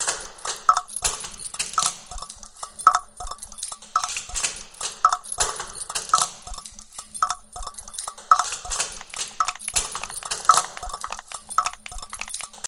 Recording of tincans.4 tracks recorded into a Kaoss korg pad3.Then played into Cubase and processed further.Location: Keflavík, Iceland
loop, larusg, tock, clock, enviorment, can, tick, tin, metal